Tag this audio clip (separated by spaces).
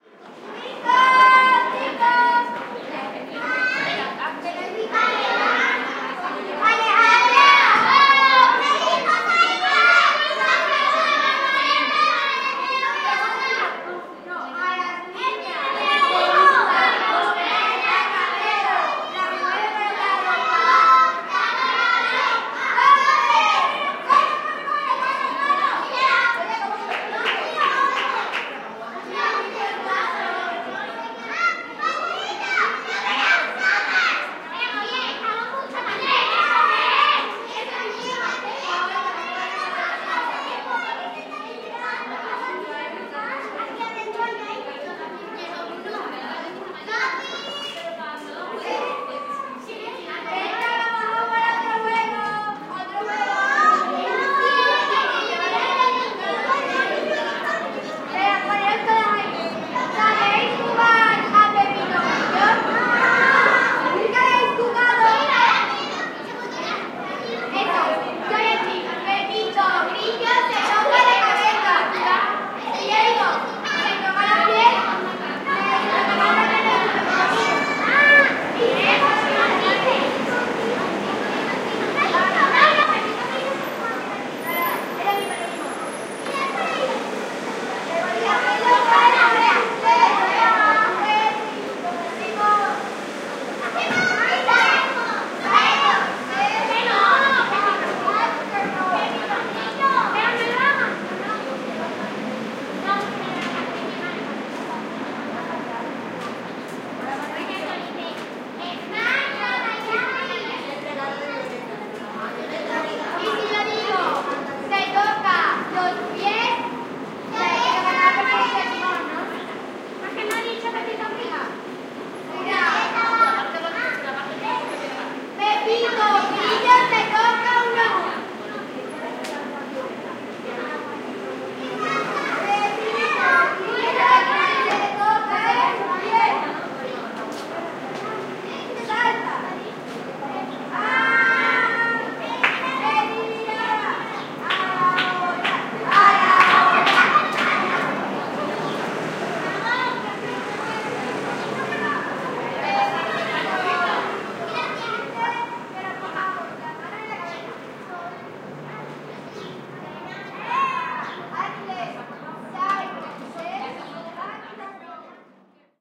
boisterous children field-recording kids kindergarten loud-mouthed play school screamer shouting Spanish talk voices yard yelling